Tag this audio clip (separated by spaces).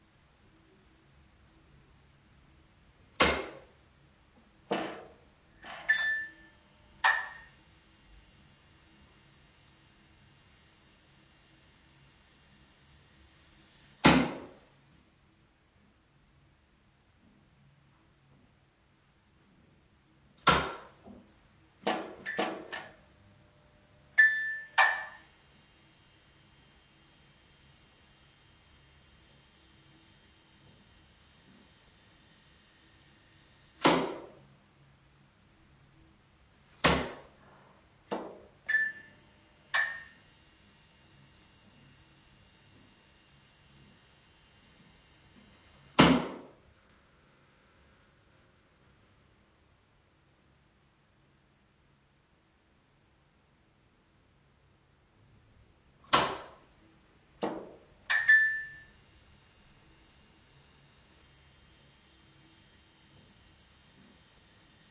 Lamp Switch Bathroom Hum